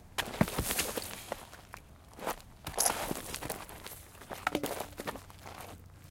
Kicking a pile of gravel. Several particles hitting a metal fence a few feet away. Then trying to brush it back together with feet, "accidentally" hitting a plastic bottle.